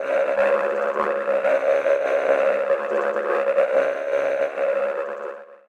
IW01-MoaningSpaceWalrus120bpm
Interstellar, Worlds